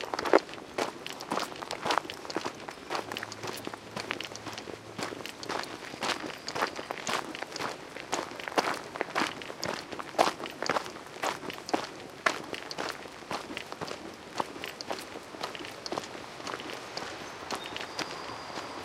Walking3 gravel
A recording of a walk on a gravel path with birds.